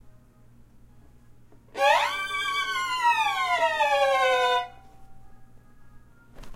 High Slide and wail
A slide up and long wail down. Played on a violin using the higher register and played with a tritone also known in older music theory as the devil's interval. This is a spooky sound.
creepy, ghost, scary, thrill